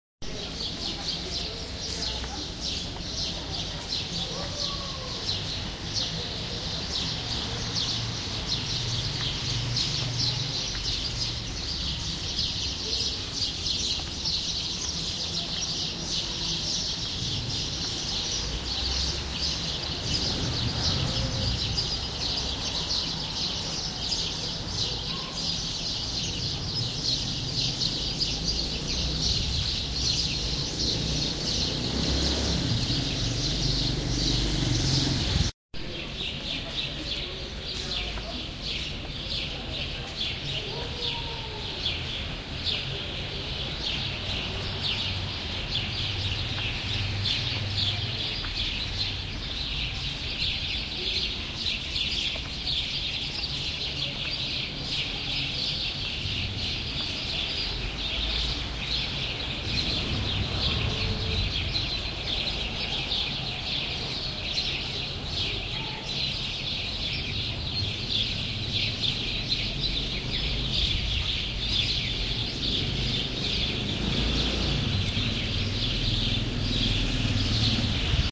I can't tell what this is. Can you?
Sunset in the backstreets of Athens Recorded with Tascam, and edited with Beautiful Audio Editor. The second part I have slowed down a bit....Still sounds OK